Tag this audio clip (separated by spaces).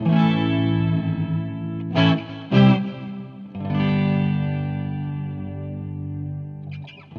electric-guitar processed-guitar riff vinyl